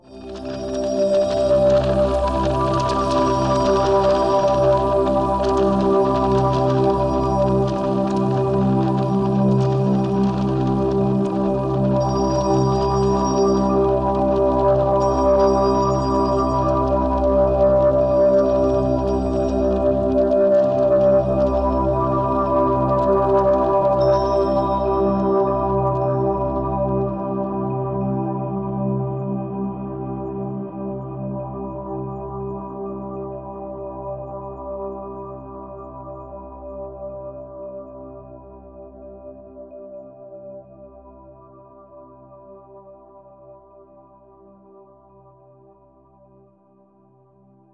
This is a deeply textured and gentle pad sound. It is multisampled so that you can use it in you favorite sample. Created using granular synthesis and other techniques. Each filename includes the root note for the particular sample.